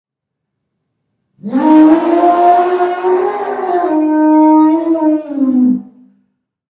A funny sound like an angry elephant blowing through its trunk. Made by blowing into a 10-foot-long PVC pipe.
angry, blare, elephant, horn, trumpet